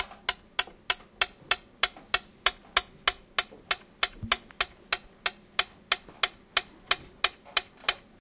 Mysounds HCP Maël plush
This is one of the sounds producted by our class with objects of everyday life.
France, Mysounds, Pac, Theciyrings